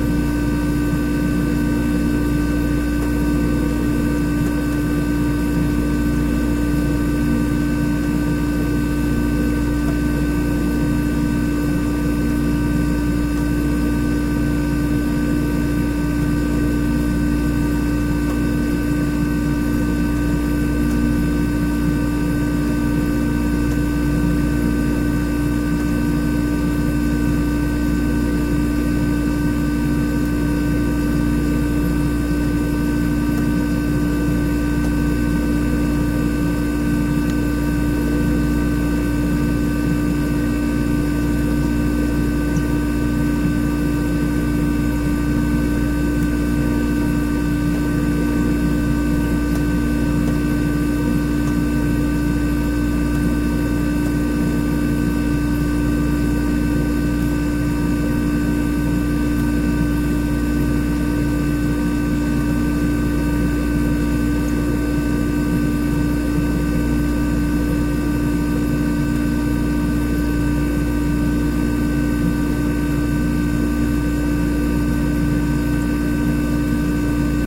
fridge old bubbly apartment kitchen superclose1
apartment, kitchen, bubbly